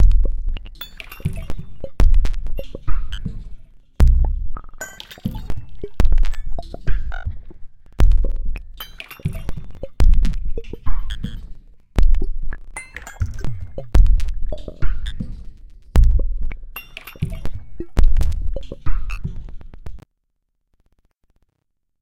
doggy glitch
lowercase minimalism quiet sounds
lowercase, minimalism, quiet, sounds